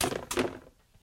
Dull hit and clatter
clatter, hit, multiple, object, random, sloppy